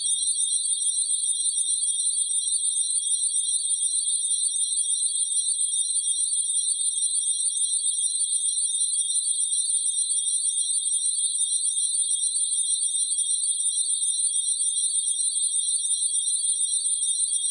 electric-cicadas03
Several layers of bright loops - a useful bed for anything twinkling or glowing. Panning and tremolo effects.
ambient, bed, bell, chimes, cicadas, digital, ding, effect, electronic, future, fx, glass, glow, glowing, korg, loop, looped, looping, metal, pad, sci-i, shine, sound, sound-design, soundeffect, sparkle, synth, synthesizer, twinkle, windchime